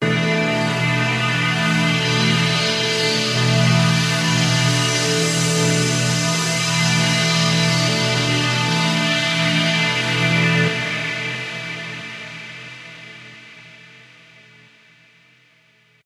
A sound of a synthesizer recently I got. I think it's more powerful than Xfer Serum.